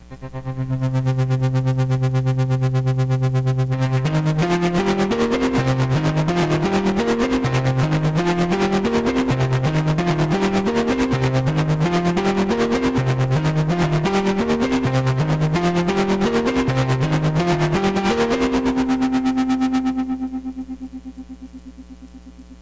piano+synth
July 19, 2019. Audacity + Arturia Minibrute + Yamaha Clavinova. With a weird filter and a cool vibe. Enjoy.